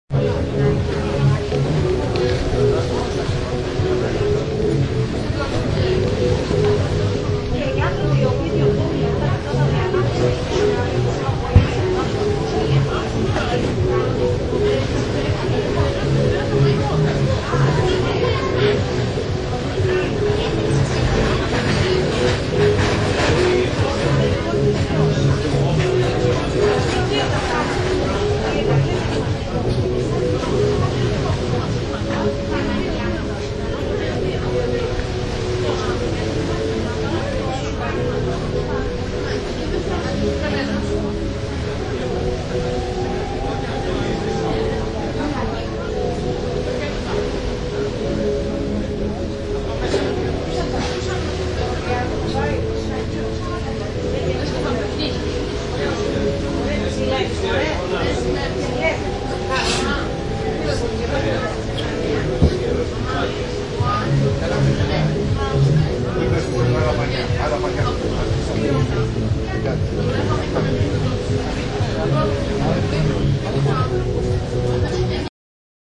athens piraeus beach
Athens, Piraeus, Beach
sea, beach, piraeus, greek, mediterranean, athens